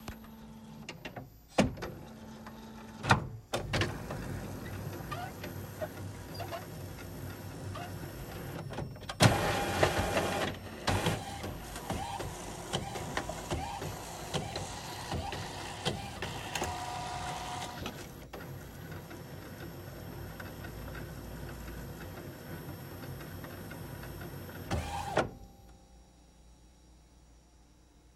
A recording of a printer printing a diagnostic page. The mic was placed in front of the output tray.
office, printer, printing